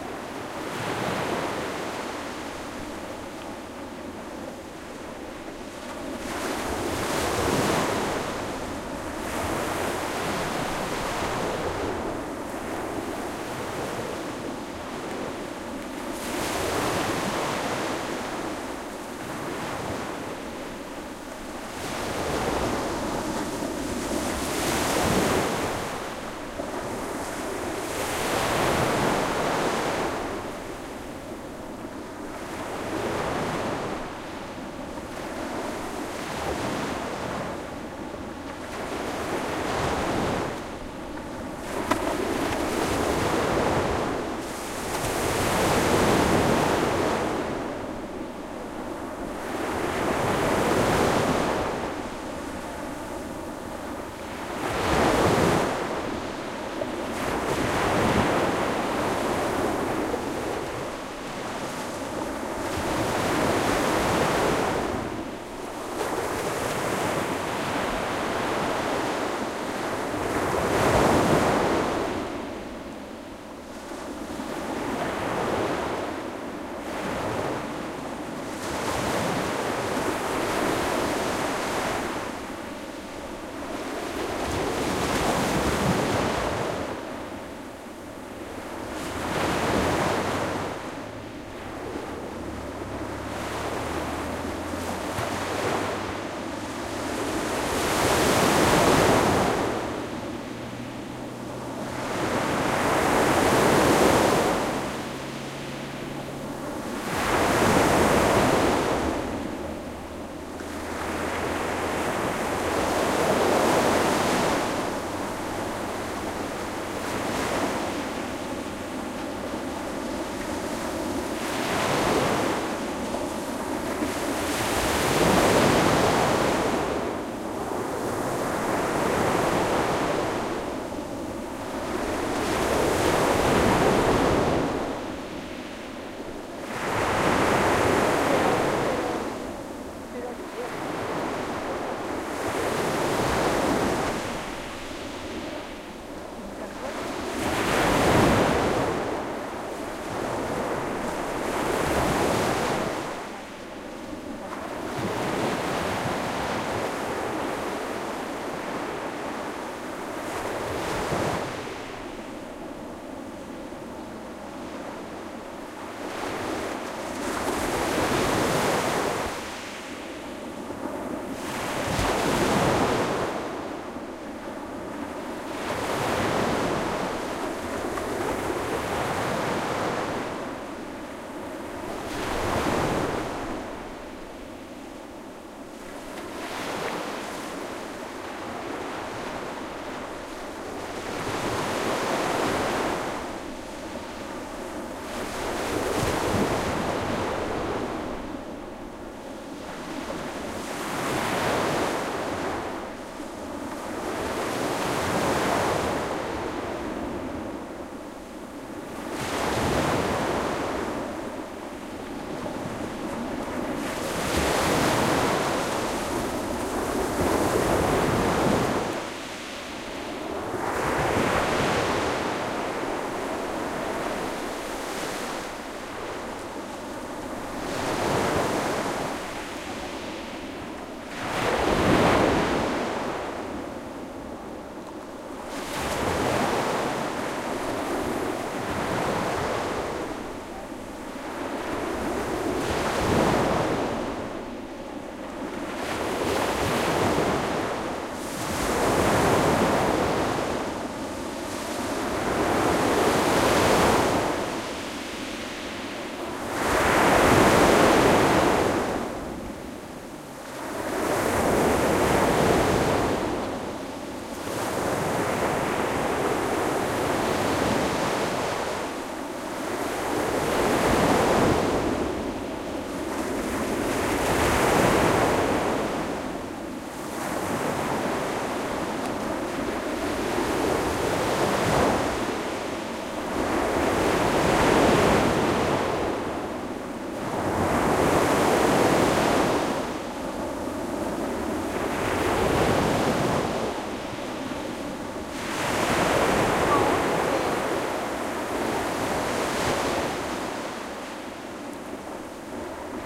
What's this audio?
Soft waves breaking on a sandy beach. Recorded at Punta Arena, Baja California, Mexico. Shure Wl183 mics into Fel preamp and Olympus LS10 recorder.